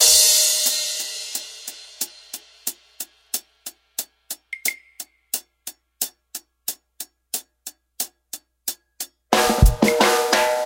14 drum mix(8) aL
Modern Roots Reggae 14 090 Bmin A Samples